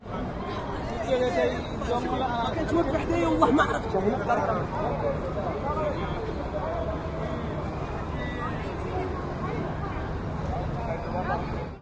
mixed voices
voices from people of different ethnic groups mixed in a street market in genova
genova, market, street, voices